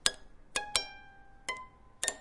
plucking a guitar neck